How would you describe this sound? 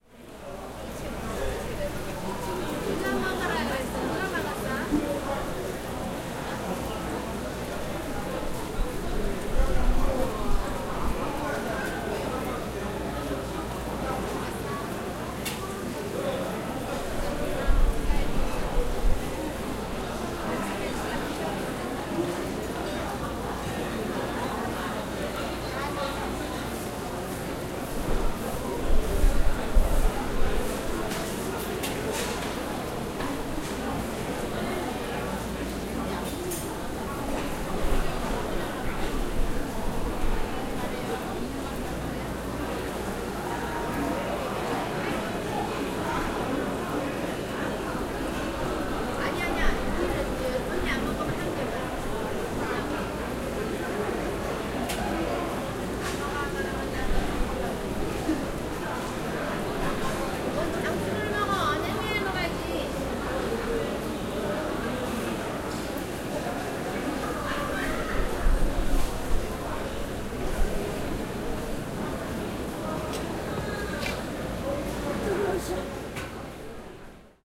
Ambience and people talking in Korean at Gwangjang Market.
20120723